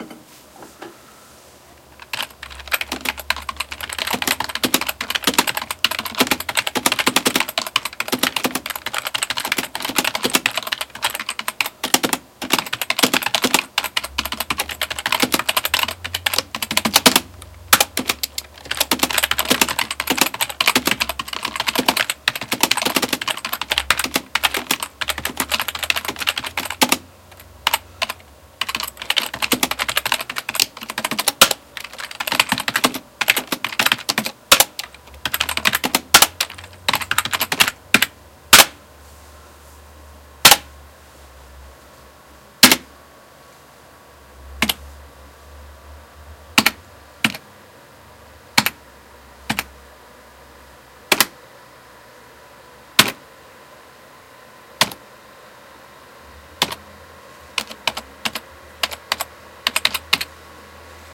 Me typing at my natural speed (quite fast I think) on my Macintosh USB keyboard. Has softer clicks than most large keyboards.
Recorded with a GL-2 internal microphone.
household, clicking, clacking, type, clack, tap, computer, click, keyboard, typing